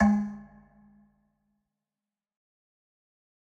Metal Timbale 019
drum, god, home, kit, pack, record, timbale, trash